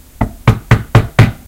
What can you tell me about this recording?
Knock Knock Sound
Knock, Waka, Happy